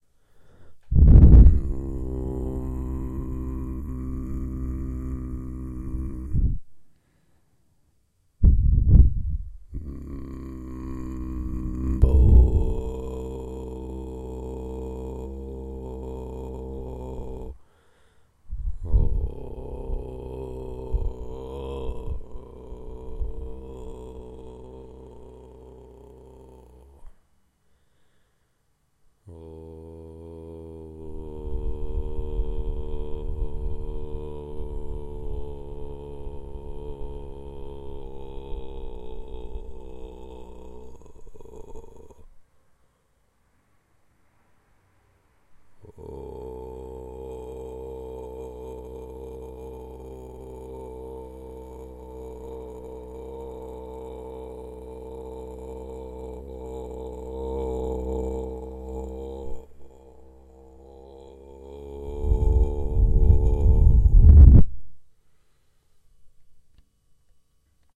Bb1 dom 01-09
It is a recording of a musical play composed by me.
Voice: Agustín Domínguez (me)
human, male, man, registro, speech, sub-grave, vocal, voice